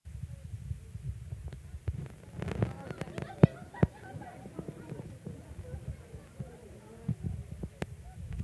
crackle fx
i went to a zoo and recorded a few things
crackle, fx, noise